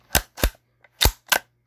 22 bolt action rifle cycle
pulling back, than pushing forward the bolt on my friend's plastic 22. might be useful for a video game or overdub
cock, load, sfx, gun, reload, polymer, rifle, bolt, game